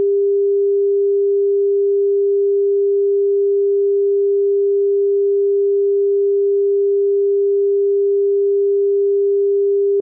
400hz sine wave sound
400hz, sine, sound, wave